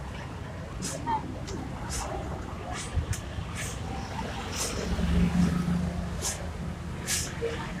Registro de paisaje sonoro para el proyecto SIAS UAN en la ciudad de Palmira.
registro realizado como Toma No 06-ambiente 1 parque de los bomberos.
Registro realizado por Juan Carlos Floyd Llanos con un Iphone 6 entre las 11:30 am y 12:00m el dia 21 de noviembre de 2.019
06-ambiente, 1, No, Of, Paisaje, Palmira, Proyect, SIAS, Sonoro, Sounds, Soundscape, Toma